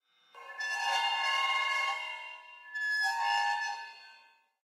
Using the tip of a drumstick to slide across a cymbal to create these sounds. Very strange and nasty inharmonics grow.
Beautiful.